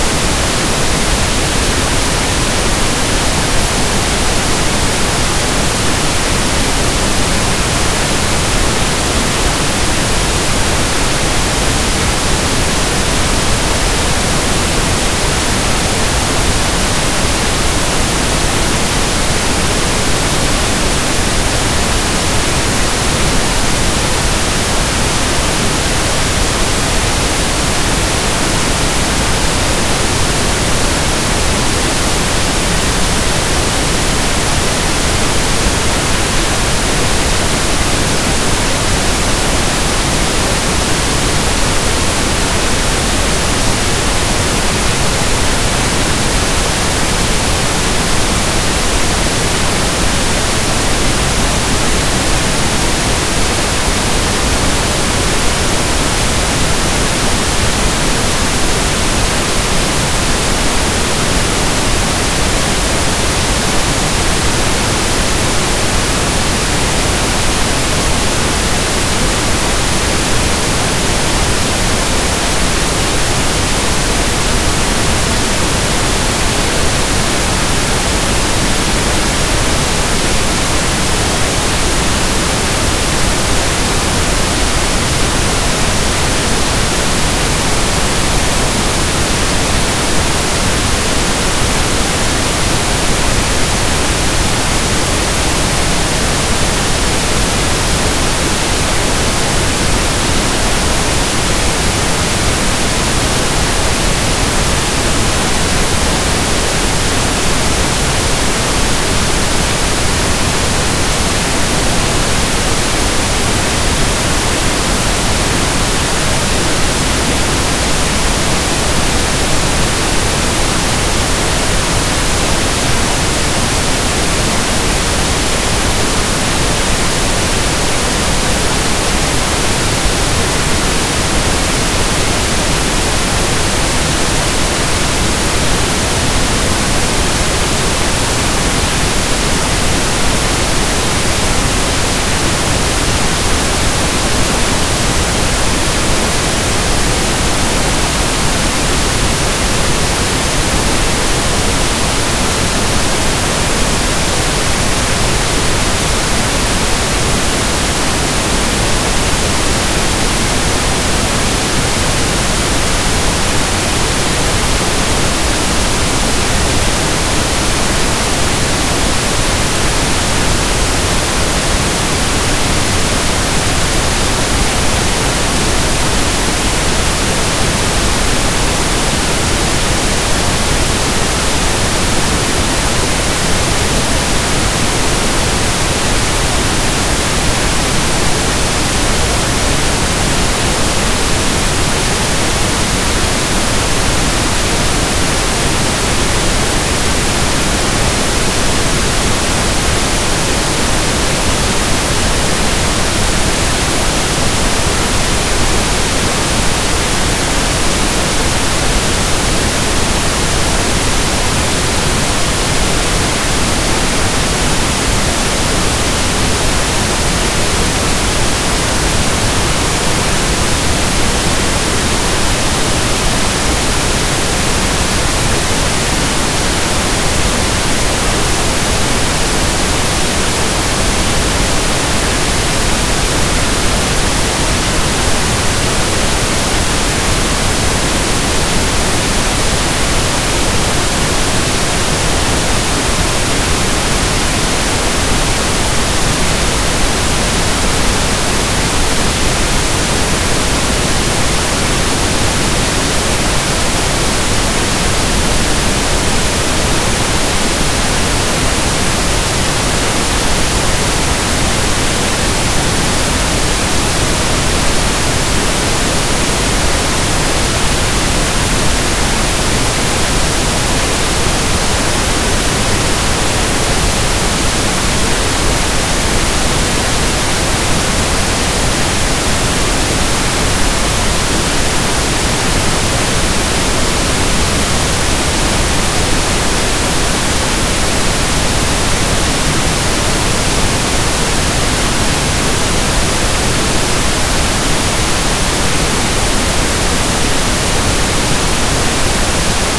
5 minutes of pink noise
testing-purpose, pink, tone, noise